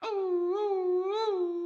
A howl of a wolf